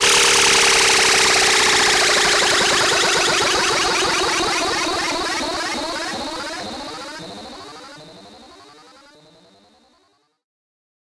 Future Glitch Sweep
effect, fx, sound-effect, sweeper, sweeping